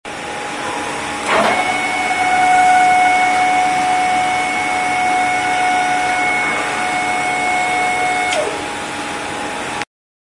Sound of hydraulic lifter (Jungheinrich) go up.